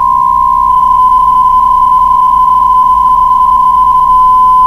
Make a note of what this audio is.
BPSK 63 baud data mode. Recorded straight from an encoder. May be useful, who knows :) - Need any other ham data modes?
ham, data, bpsk, baud, 63, radio